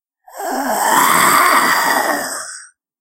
Zombie Growl 1
I recorded this sound (of myself) using audacity. It is a simple zombie sound effect, a growl.
137, Creature, Dead, Growl, Growling, Horror, Monster, Scary, Snarl, Snarling, Undead, Zombie